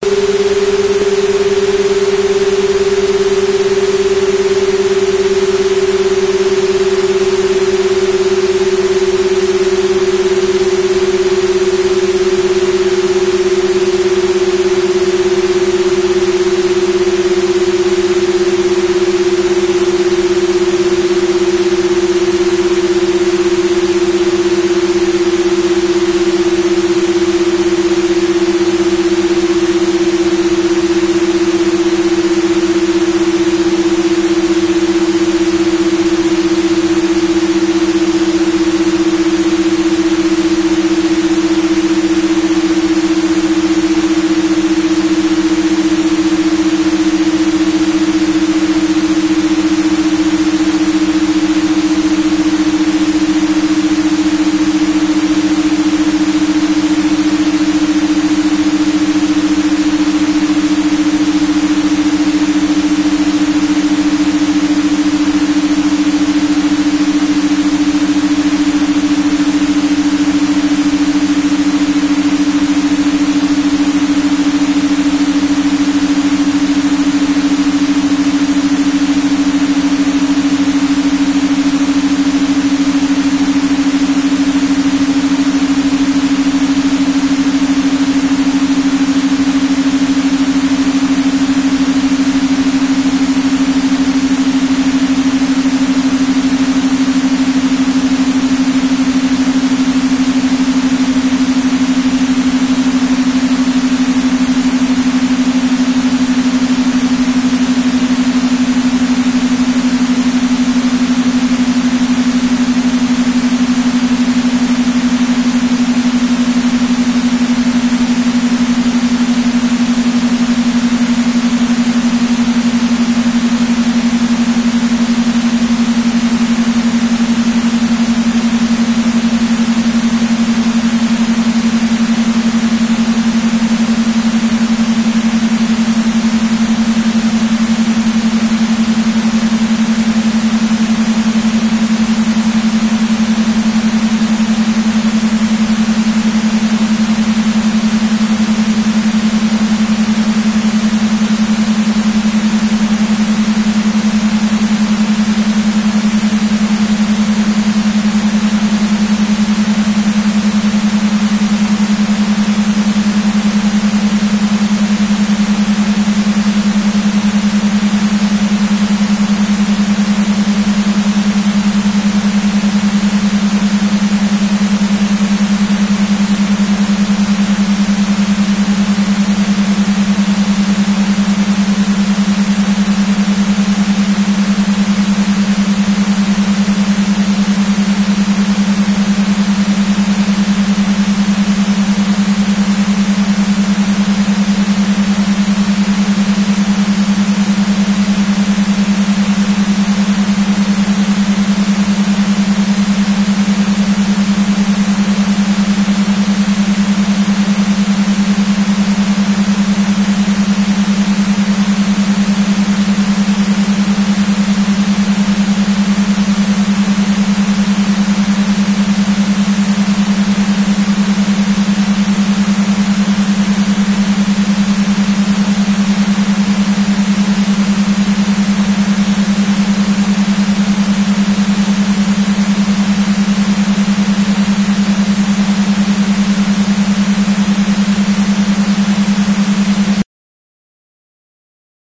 Track eleven of a custom session created with shareware and cool edit 96. These binaural beat encoded tracks gradually take you from a relaxing modes into creative thought and other targeted cycles. Binaural beats are the slight differences in frequencies that simulate the frequencies outside of our hearing range creating synchronization of the two hemispheres of the human brain. Should be listened to on headphones or it won't work.
alpha, wave, delta, brain, gamma, beat, bianural